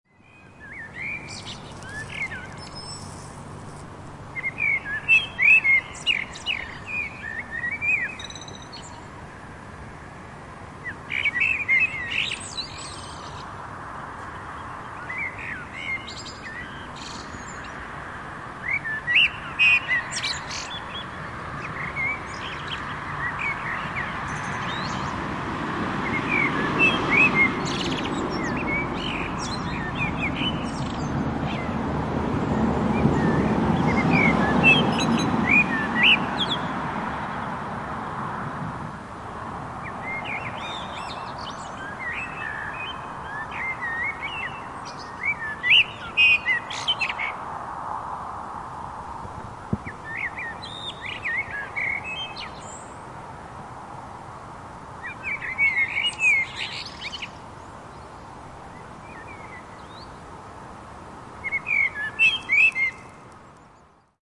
Blackbird - Birdsong - Suburban - Park
A short recording of a pair of black birds singing with quiet traffic in the background. Boscombe, UK.
town, ambiance, birds, birdsong, traffic, nature, park, spring, field-recording, bird, suburban, blackbird